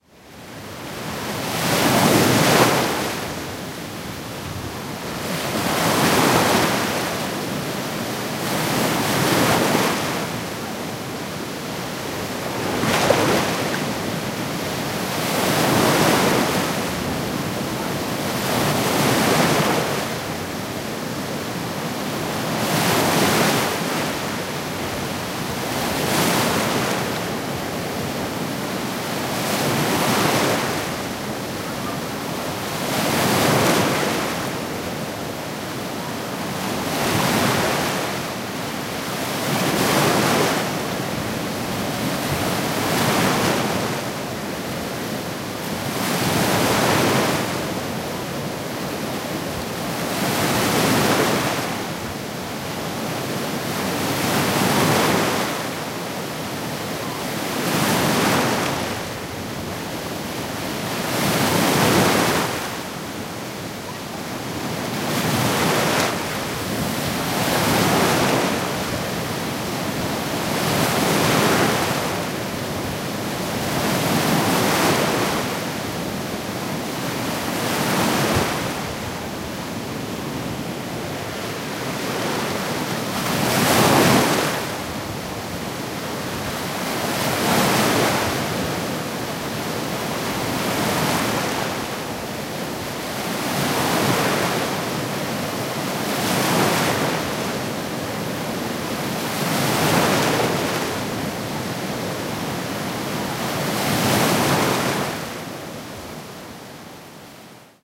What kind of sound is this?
La Rubina's beach with surge, near of Castelló d'Empúries in Catalonia.
Zoom H2
field-recording, beach, nature